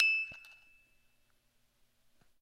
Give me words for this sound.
MUSIC BOX E 3
16th In chromatic order.
music-box, chimes